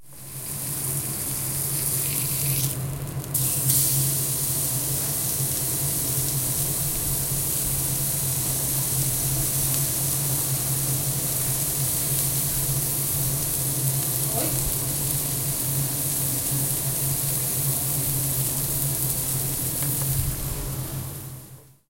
meat grill
The sizzling sound of a piece of meat being grilled at the kitchen of UPF Communication Campus in Barcelona.